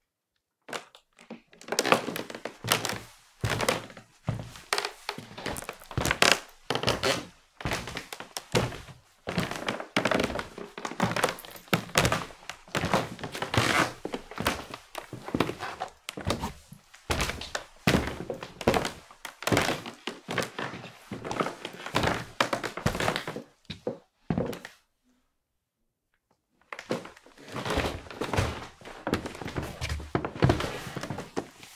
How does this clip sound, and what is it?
Footstep - Creaky Wooden Floor
Footstep (lathr shoes or boots) on a very creaky wooden floor
shoes, creack, Footstep, Int, Interior, Floor, boots, Wooden, wood